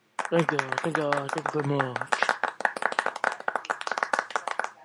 A man saying "Thank you, thank you, thank you very much" in a deep voice (me). This version has a small crowd applauding in the background (all me, layered in Audacity). Recorded using a Mac's Built-in Microphone.